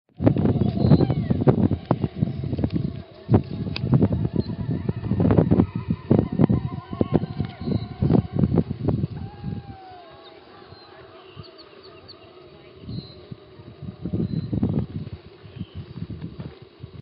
Standing in the middle of the Kilauea Iki Crater in Volcanoes National Park, listening to the reflections of peoples’ voices bounce off the crater walls. This recording is too windy for use in production, but can be used for research.
Recorded on 31 December 2012 with a Zoom H4. Light edits done in Logic.